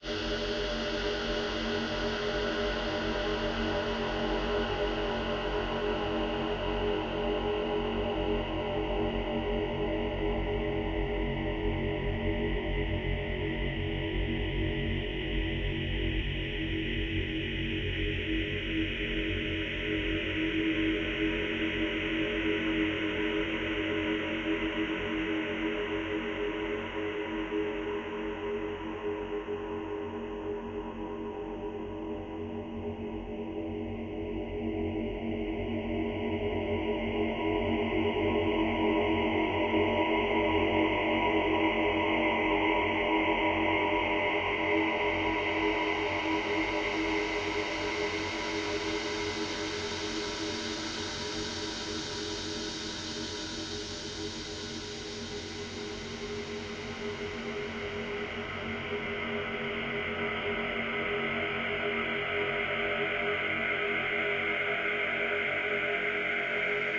Low, metalic drone in F# made by time-stretching some voice sample ran through vocoder (using Paul's Extreme Sound Stretch). It has some digeridoo timbre, but just a little bit :) Enjoy.
ambient, drone, extreme, soundstretch, paulstretch, sound-design, digital, metal, vocoder, metalic, digeridoo